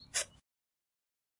Footstep on grass recorded with Zoom Recorder